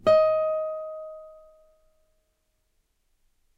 2 octave d#, on a nylon strung guitar. belongs to samplepack "Notes on nylon guitar".
d, guitar, music, note, nylon, string, strings